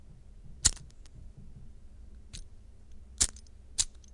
Lighting a small Bic Lighter...
spark, Handheld, lit, lighter, gas, match, strike, light, portable, fire